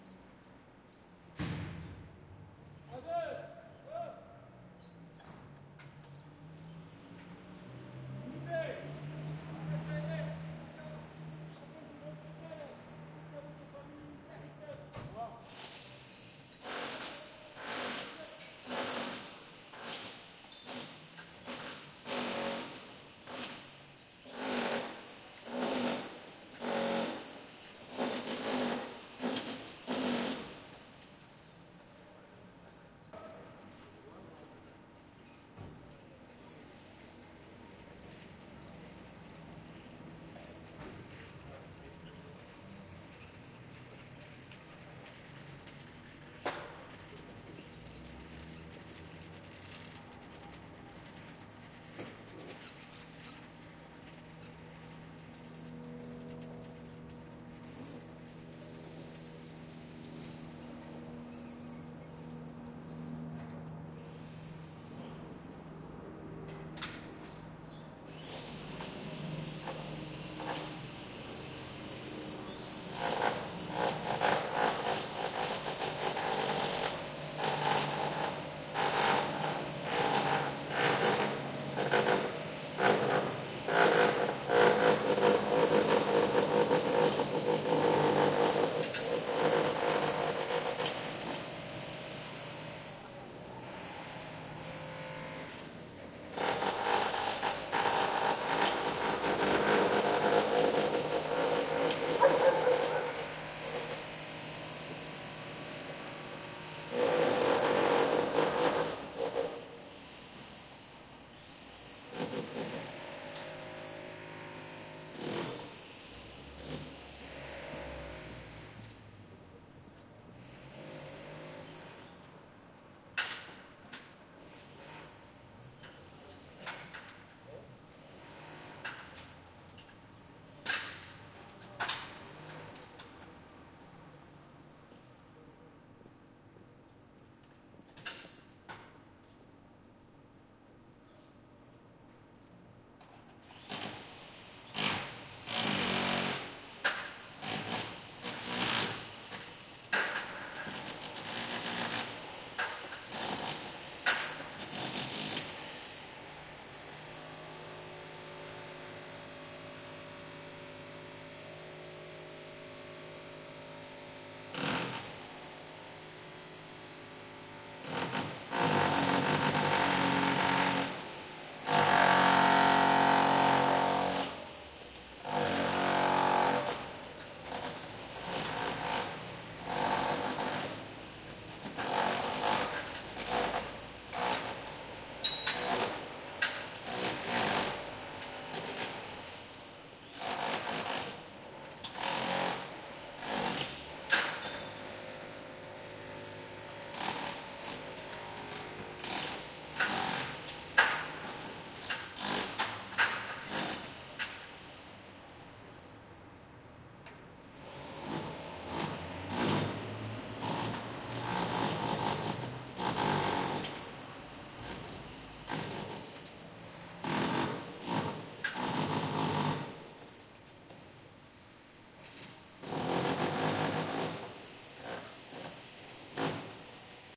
streetlife workers 1
Workers in the facade of a building (Barcelona).
Recorded with MD MZ-R30 & ECM-929LT microphone.